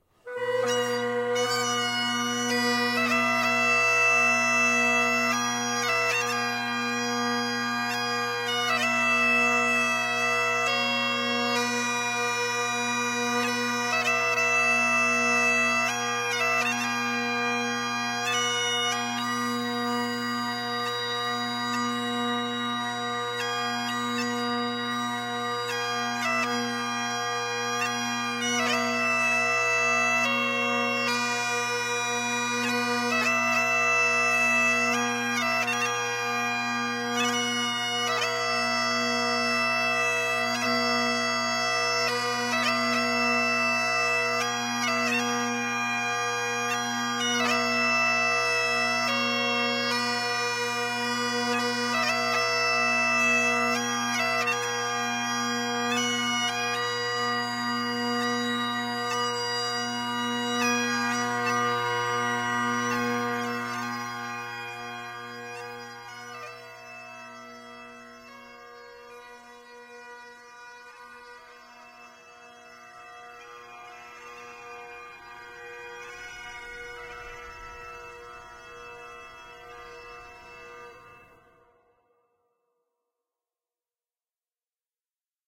BAG PIPES AMAZING GRACE 2
Mono recording, bagpipes. Recorded with a Shure SM81, Tascam 70d and sweetened in Adobe Audition. Piper turned and walked away at the end of this recording, to match police & fire funerals.
amazing, bagpipes, funeral, grace